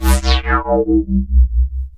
A thick, rich, chorused falling filter sweep with amplitude modulation from an original analog Korg Polysix synth.
analog, bleep, chorus, drop, fat, filter, fx, korg, low, polysix, sweep, synth, thick, tremolo, warm